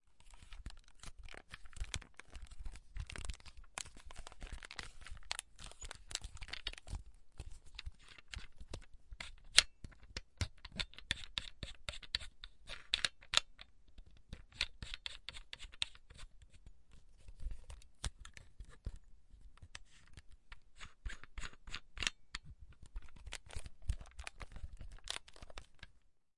vivitar 2800d flash - creaking
Handling a Vivitar 2800D flash, and spinning the tightener.
2800d, camera, camera-flash, flash, photo, photography, picture, vivitar, vivitar-2800d